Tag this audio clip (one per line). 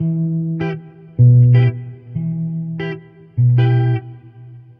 electric
guitar